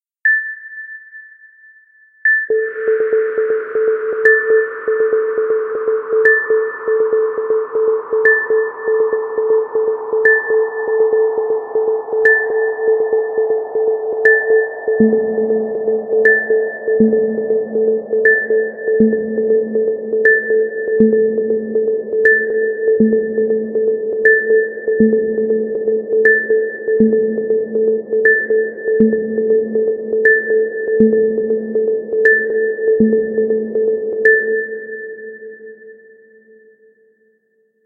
Wind + Light Drops. 120 bpm. Made in LMMS, 11/21/17. Sounds like a submarine found on radar.